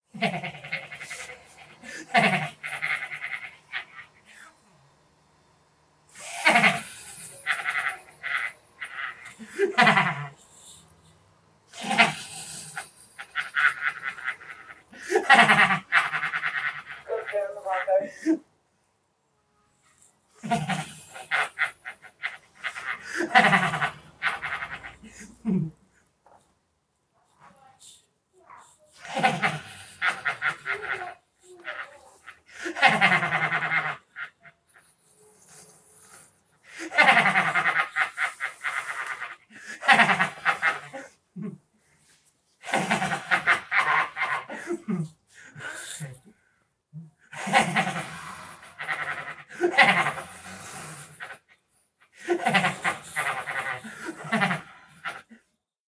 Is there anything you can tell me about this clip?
Horrifying Laughing
One of the most haunting sounds that I have ever captured. I got on the bus one day and didn't notice anyone on there when I sat down, and then a few moments later, I started hearing this sound. I was confused as to what to do. Do I turn around and look at this laugh-er in the face? What would a person laughing like this do to me if I looked at them? How could a person even laugh like this? It doesn't sound physically possible. As more and more people got on the bus, I saw nobody looking in his direction either. Am I maybe imagining this sound? IS THERE A TINY MANY LAUGHING IN MY HEAD?
Upon leaving the bus, I looked back and couldn't tell who must have made the sound, but regardless, it still literally shakes me a bit hearing this audio.
Recorded with an Android phone and edited in Audacity